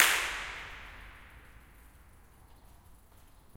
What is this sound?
clap at saltdean tunnel 4
Clapping in echoey spots to capture the impulse-response. You can map the contours to make your own convolution reverbs
ambient, atmosphere, bang, clap, convolution-reverb, echo, impulse-response, reverb, room, snap